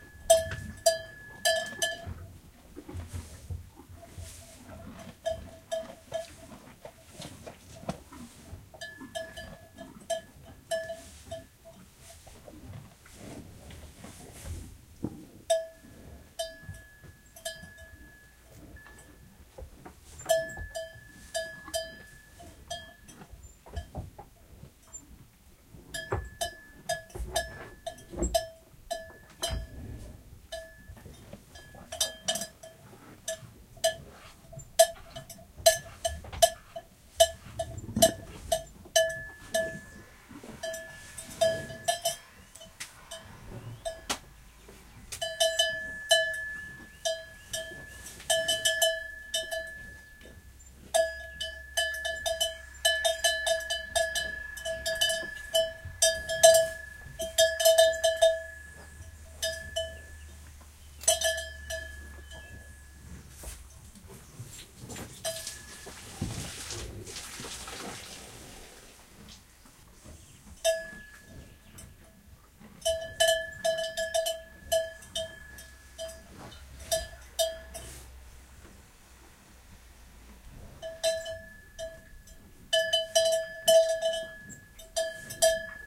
I put a micro through a hole in a stone barn and this is what I recorded.
eating, cow, bell, breathing